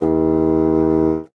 Fgtt 39 Eb1 3b
fagott classical wind
classical, fagott